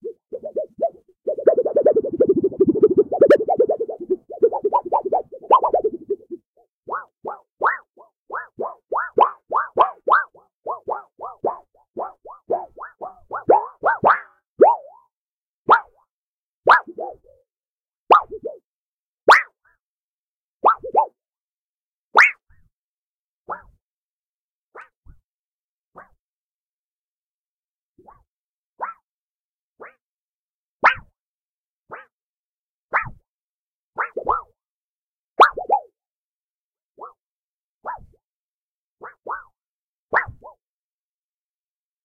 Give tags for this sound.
boing
cartoon
comedy
fun
funky
gibbons
metal
metallic
monkey
ridicule
sheet
sheet-metal
sound-design
sound-effect
womp
wonky

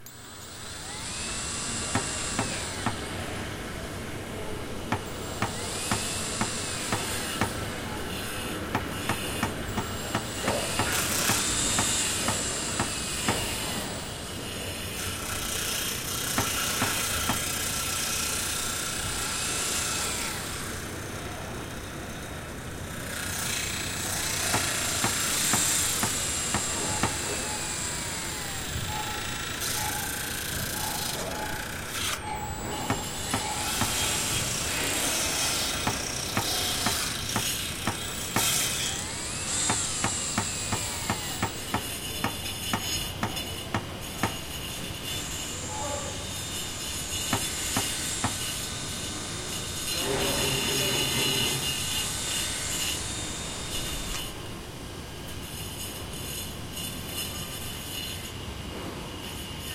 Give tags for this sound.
work
jack-hammer
reverb
drilling
labour
hammer
construction
site
drill
saw
construction-site
building
basement
nail-gun
working
constructing

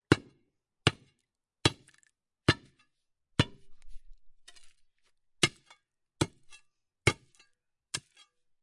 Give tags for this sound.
crowbar
hit
impact

